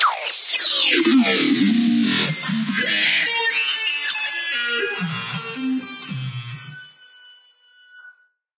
Warped Downlifter
A Downlifter that you can use in any of your songs
Dance, Dubstep